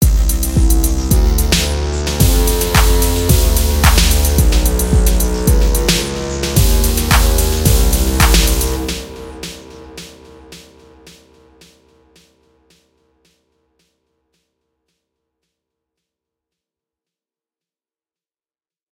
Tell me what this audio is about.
2013 rave 110 bpm 4
4. part of the 2013 rave sample. Rave techno like instrumental loop
trance, rave, 110, dance, 2013, loop, beat, drum